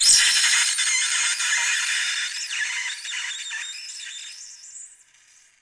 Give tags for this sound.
polystyrene
noise
styrofoam

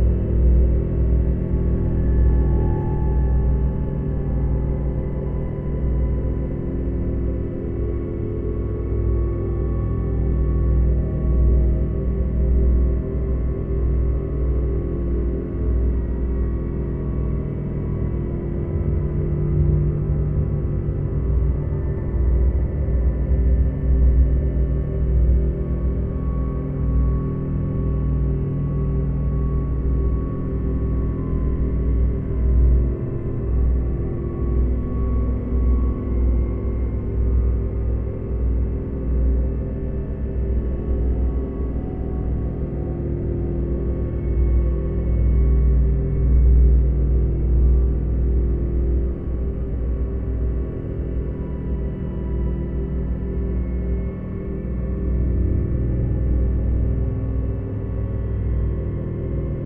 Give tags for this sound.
atmosphere
ambiant
horror
ambience
drone
soundscape
hell
ambiance
doom
ambient
evil
scary

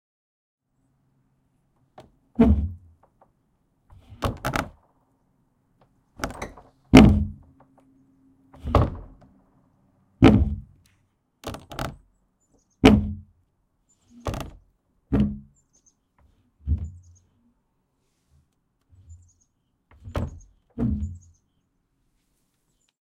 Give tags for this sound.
Barn
Close
Door
Open
Scrape
Wood

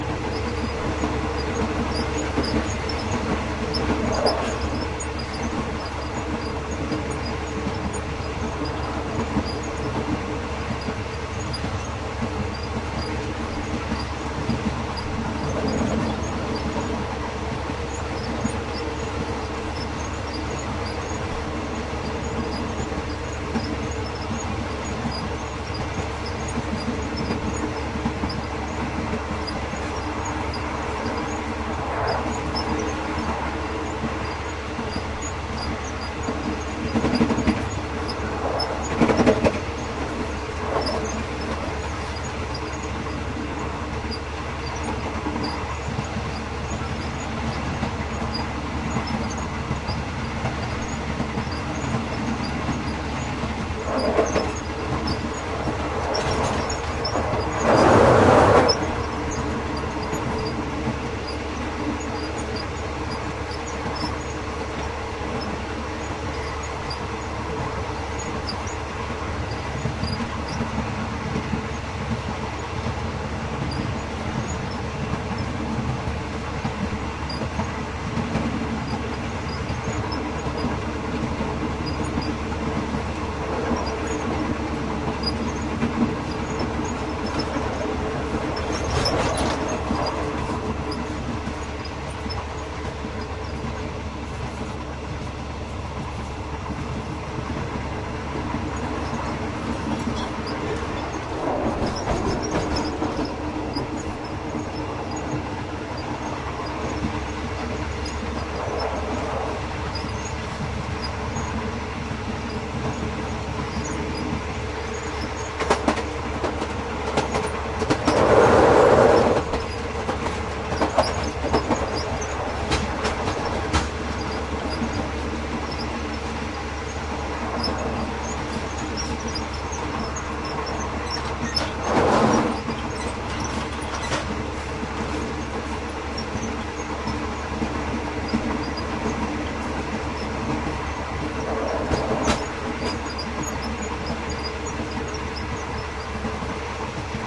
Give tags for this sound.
field-recording
cars
passenger
between
train
Thailand
moving

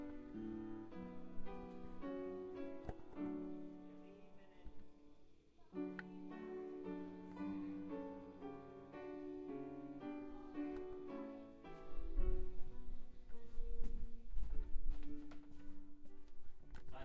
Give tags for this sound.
sonic-snaps
school-mood
field-recording

